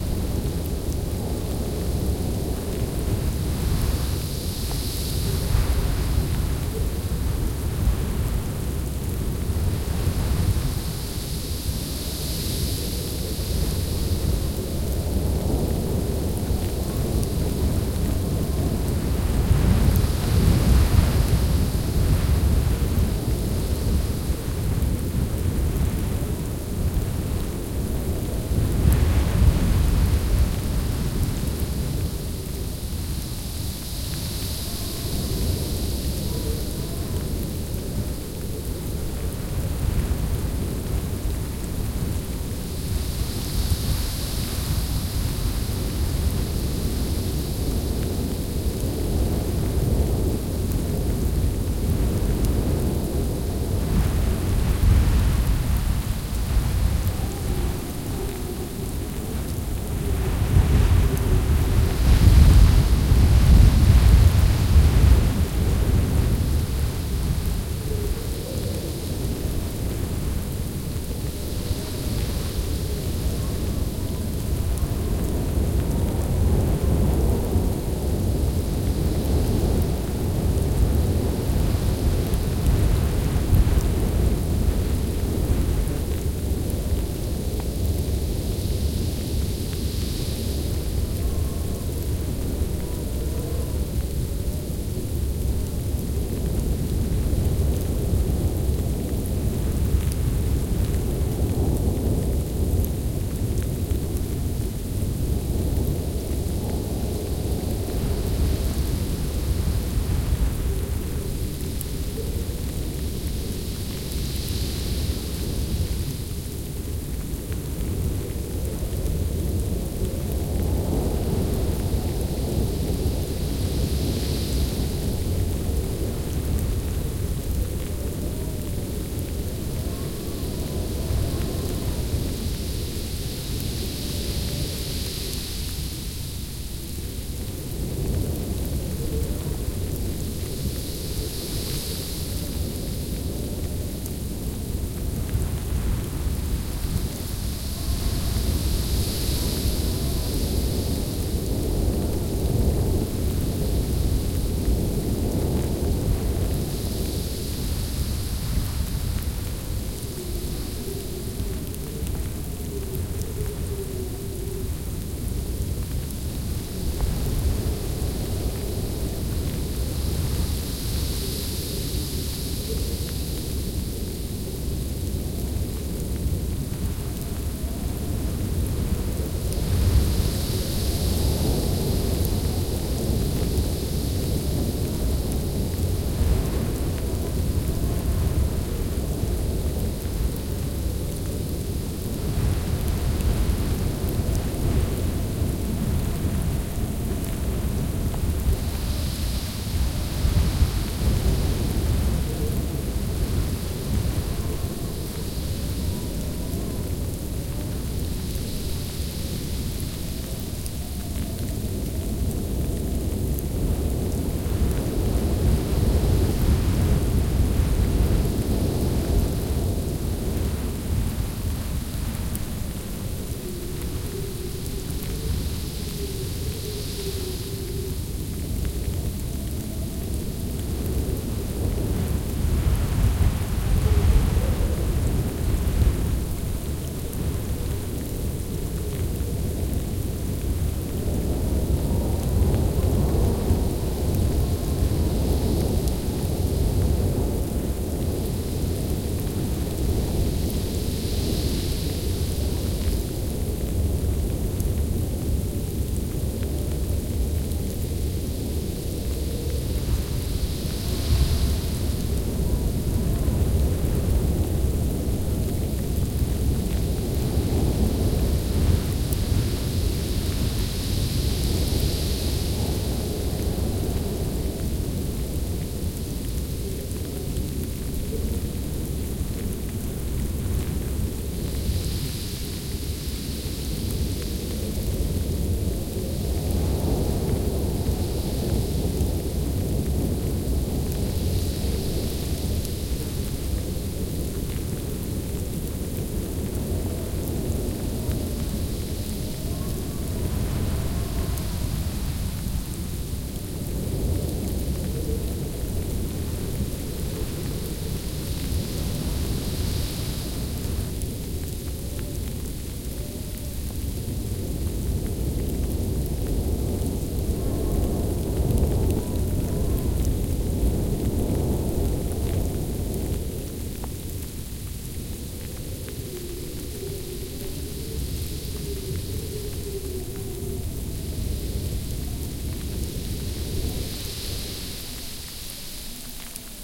Howling winter storm ambient sounds:
Imagine you are warm and cosy in your bed listening to the howling wind and heavy snow outside. These winter sounds are the perfect background for sleeping and relaxing. Enjoy mother nature at her best!
My own recording is mixed with the following sounds:
I have editing and mixing these sounds together.
(Thank you all for the beautiful sounds).